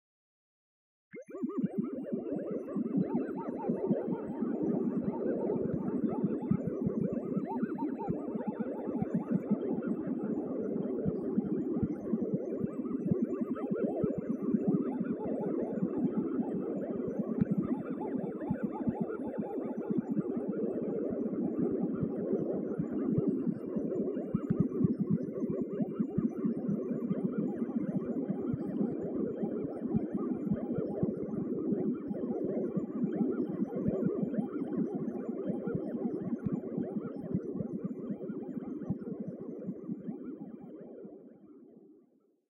Magic Bubbles
Sparkling bubbles sound effect
bubbles, bubbling, fx, magic, sfx, sparkling